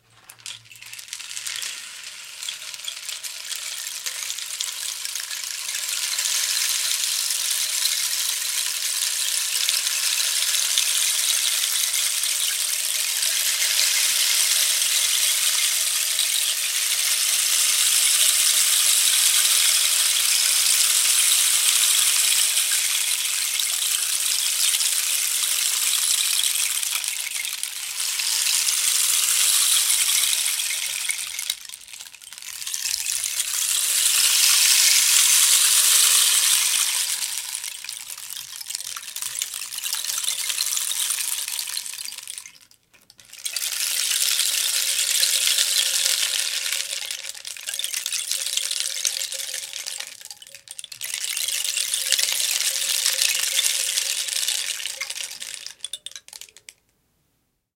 rainstick in studio
instrument, rain, rainstick